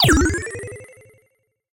Game Bleeps 3
Something synthesised in NI Massive which could be used as an effect in an old-school game or something similar.